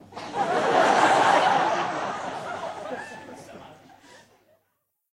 LaughLaugh in medium theatreRecorded with MD and Sony mic, above the people
theatre, prague, auditorium, laugh, czech, audience, crowd